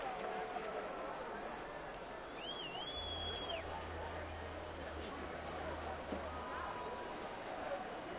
Small section of general crowd noise at a sports stadium.